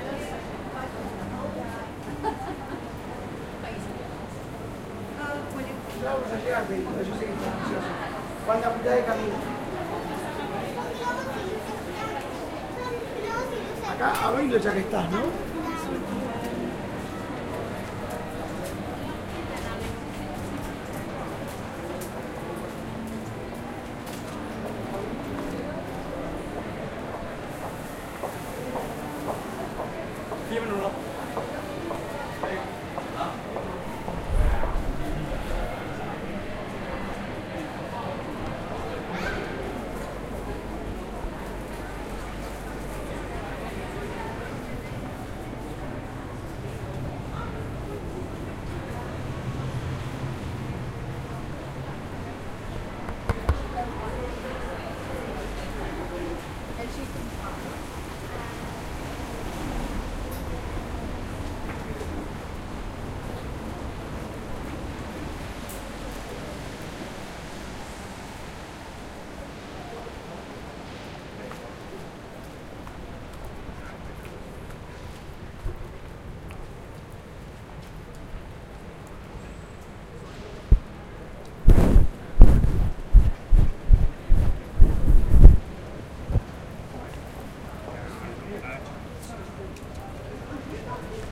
Covent Garden rain 5
Was in central London with a half an hour to spare. It was a rainy day in September. Each clip is a few minutes long with sounds of people chatting and walking by. Some clips have distant music or cars driving by. Some clips suffer a bit of wind noise.
Covent-Garden, tourists, London, rainy-day, ambient, soundscape, atmosphere, field-recording, ambience, crowds